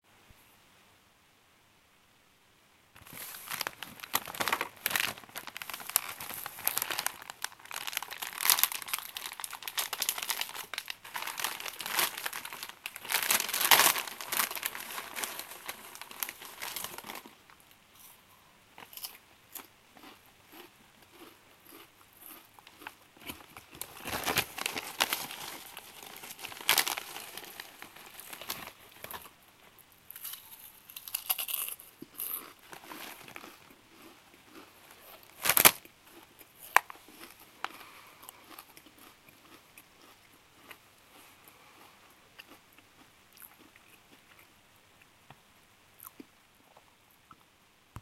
Opening a bag of potato chips and eating them